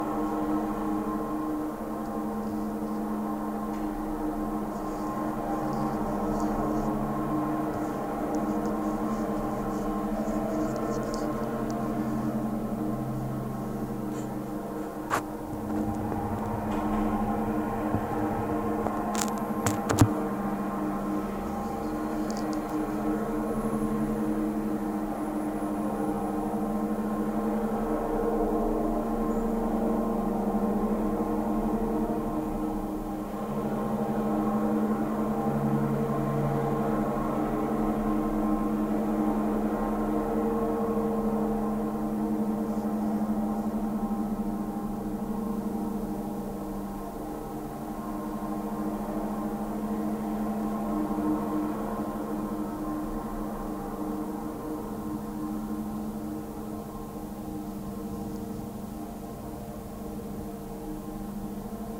Bronze Uppsala 01 Befrielsen
Contact audio of bronze sculpture Befrielsen (Liberation!) by Olof Hellstrom in Uppsala, Sweden. Recorded June 10, 2010 on Sony PCM-D50 using a Schertler DYN-E-SET pickup and normalized in Audacity. This is the left fist.